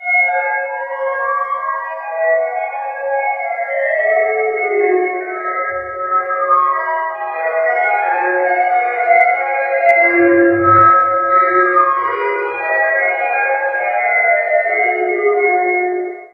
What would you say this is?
20795 djgriffin ice cream van 06-loopable
A Field Recording of an ice cream truck passing by two buildings playing the song What Child is This. The sound was originally made by djgriffin, redone once by zerolagtime, and then redone a second time by me. Added Additions: Put through low pass and high pass filters. Added reverb. And normalized a bit. Also made fully loopable. Enjoy. Comment if you want. Thanks. Made with Audacity.